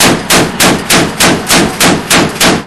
Specific details can be red in the metadata of the file.